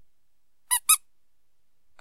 A squeak toy my dog tore up. Sqeaked in various ways, recorded with a BM700 microphone, and edited in audacity.